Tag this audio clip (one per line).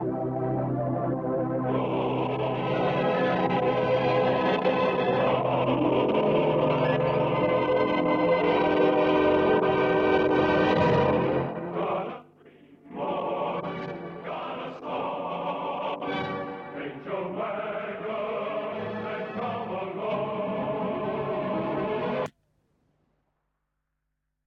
chop
reel-to-reel
choir